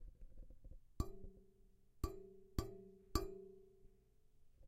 Metal Banging
metal, bang, clang